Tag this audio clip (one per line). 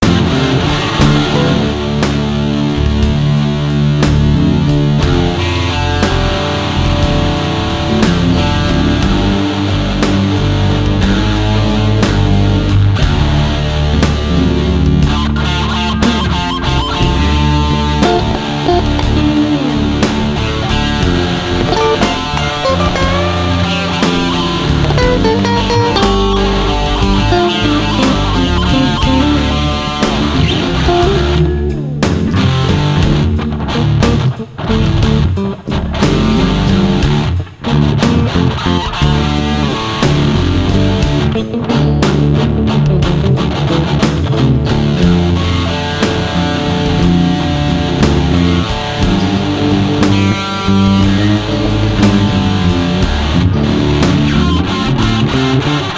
Loop
Music